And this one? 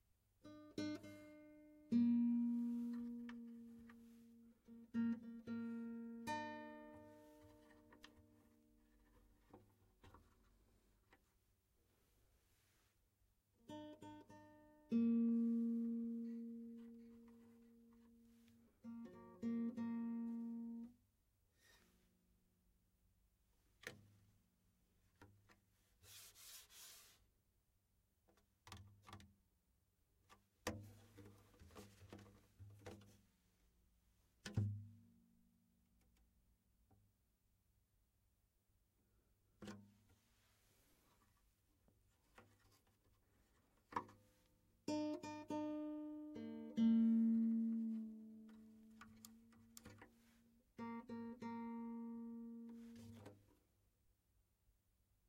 Tuning and touching an acoustic guitar
Touching and plucking the strings and body of an acoustic guitar.
Recorded with AT2035
acoustic-guitar, guitar, chord, tuning, pluck, tune, wood, string, instrument, touch